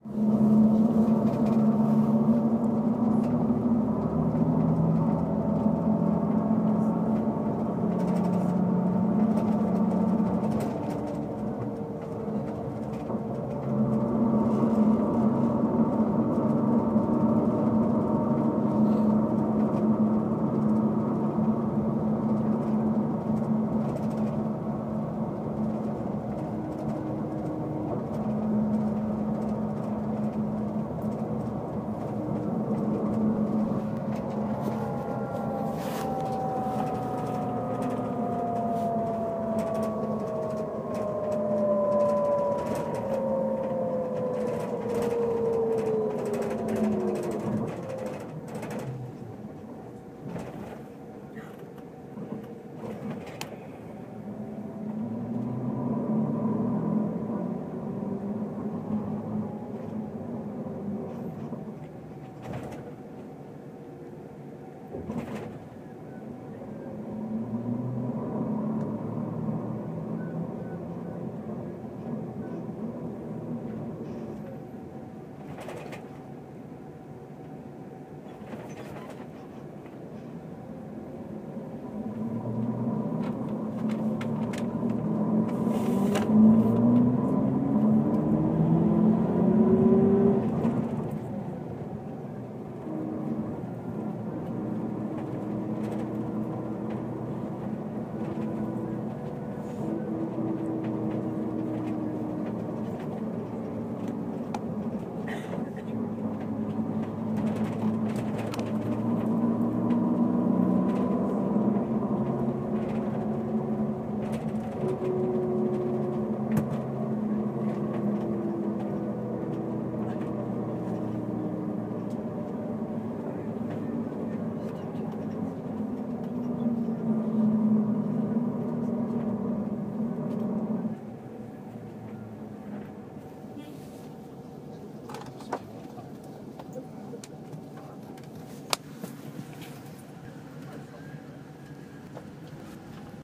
bus engine
This was a recording I have done when heading to an airline that will take me from Bodrum to Istanbul, Turkey. Thought the engine of the bus worthed recording and found a quiet moment to do so. This has been recorded with an iPhone 4s and has been edited with goldwave.
airline, bus, engine, iPhone, transportation, vehicle